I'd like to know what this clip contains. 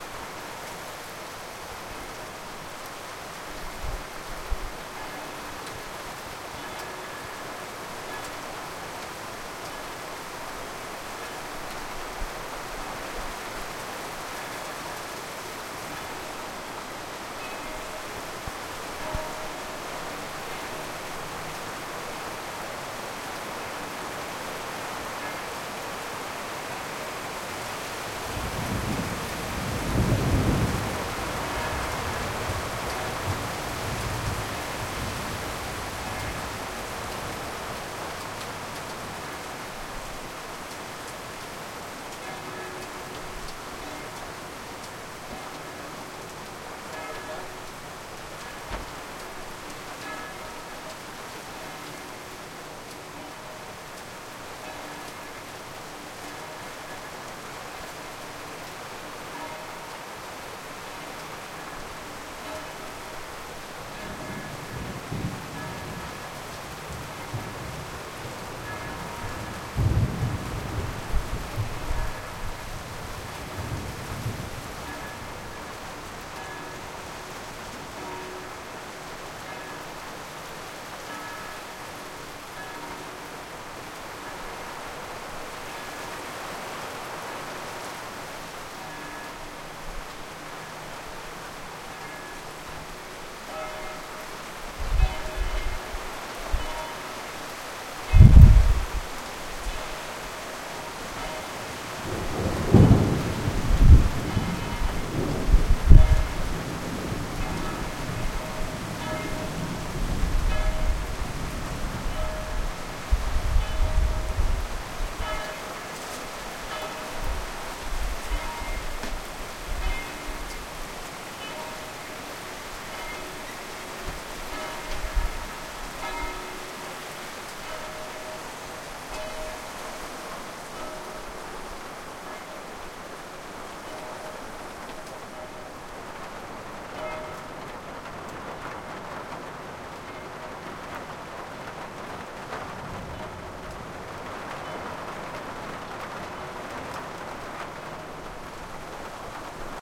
rain and bells